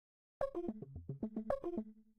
Online Chat Xylophone FX
Made with Bitwig Studio. Instrument: Onboard-Synth-Plugin. FX: Flange, Echo.
Status, Handysound, Sound, Handy, Chat, Online